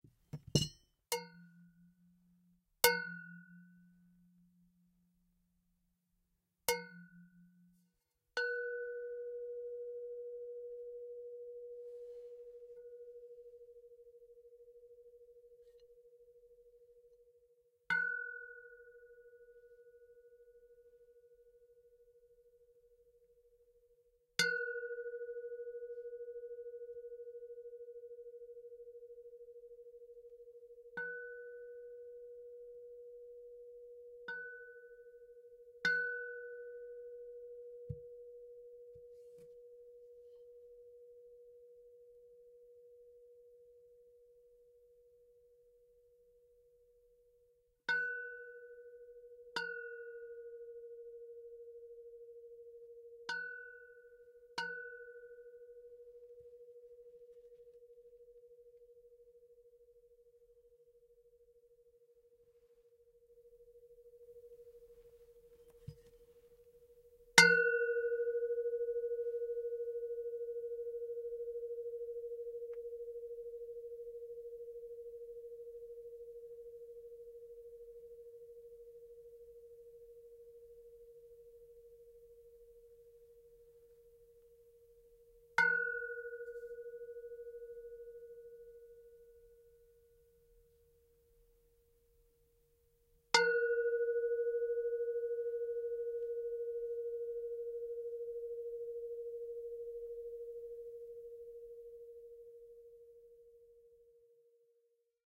Metal sustained impacts
Light metal impacts. Sounds like a bell. Recorded on ZOOM-H6 with Sennheiser 416 mic (shotgun type).
impact, surrealistic, metal, psychedelic, dreamlike